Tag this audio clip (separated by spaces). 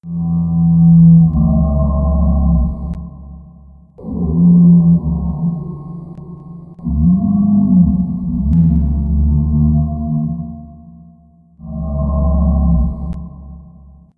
bigfoot effect nature sasquatch sound yeti